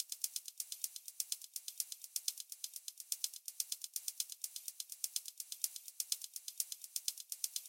fast hat loop
Electronic hi-hat loop at 125 BPM. Each sound in the patterns is slightly different.